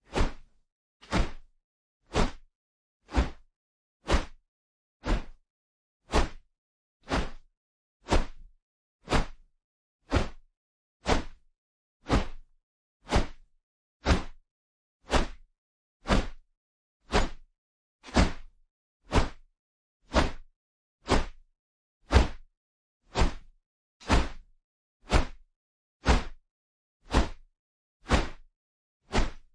swipes and whooshes plastic umbrella fast and short swings stereo ORTF 8040
This sound effect was recorded with high quality sound equipment and comes from a sound library called Swipes And Whooshes which is pack of 66 high quality audio files with a total length of 35 minutes. In this library you'll find different air cutting sounds recorded with various everyday objects.
beat, swings, attack, racket, swipes, foley, umbrella, box, body, swipe, plastic, whip, fighting, whooshes, fast, kickbox, martial-arts, swing, whoosh, tennis, punch, combat